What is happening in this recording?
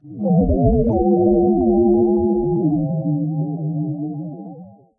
ticklish-wave
An abstract sound created with Bosca Ceoil and Audacity. The sound has been pitched shifted repeatedly. It is noise, but hopefully you'll find it to be a pleasant sort of noise.
If memory serves, some forms of (non-audio) computer data can produce sounds like this if imported into an audio processor.